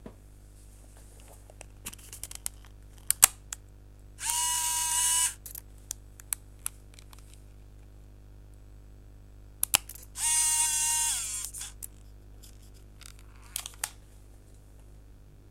camera servo
Noise from my digital camera's servo motor when I open and close it (servo motor makes the objective lens pop out after cover is opened and pop back in for closing).Can't remember why I decided to record this sound.Recorded with a cheap webmic
noise, zoom